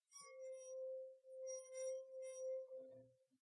cristal grinçant V2-1
harmonic sounds of a crystal glass excited by wet finger
fingers, glass, a, crystal